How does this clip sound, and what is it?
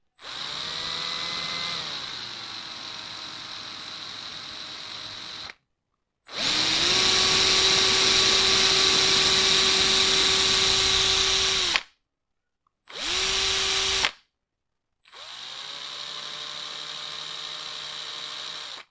Parafusadeira screwdriverl
Sound of a Bosch screwdriver recorded with a bm-8000 mic, edited on audacity.
workshop, drilling, screw, tools, drill, woekshop, parafusadeira, screwdriver